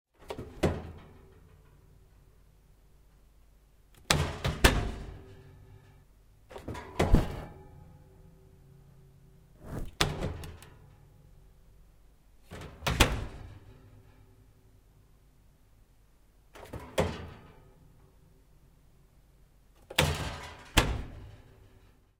Oven door opening-closing
Zoom H6 recording